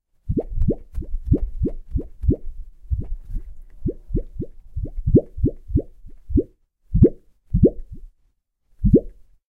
A sheet of plastic being wiggled to create a bubbly effect. Nice and bouncy!
Recorded with a Zoom H4n.